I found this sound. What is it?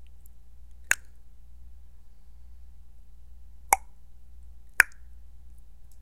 Tongue Click
Recorded myself clicking my tongue with PX21 Headset's Mic.
Clack, Click, Mouth, Tongue